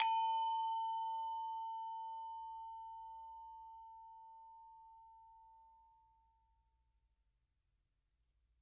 Bwana Kumala Gangsa Kantilan 06
University of North Texas Gamelan Bwana Kumala Kantilan recording 6. Recorded in 2006.
bali, gamelan, percussion